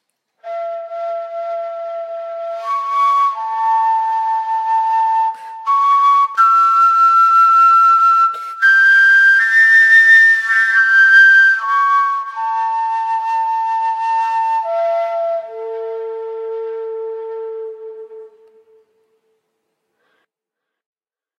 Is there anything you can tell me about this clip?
Flauta de armónicos
arm, instrument, flute, acoustic, flauta